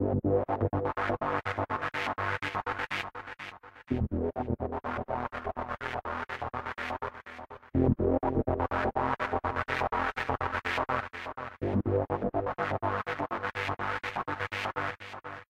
prologue02 loop
A simple loop for my game 'Don't want to be an Eyeraper'
game-development, intro, loop, mystic